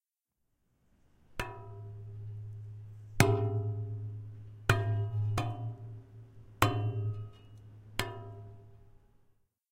Metal Fire Escape
This sound is of a palm striking the steps of a fire-escape to create a ringing tone
palm, aip09, strike, stairs, fire-escape, banging, stanford-university, stanford, metal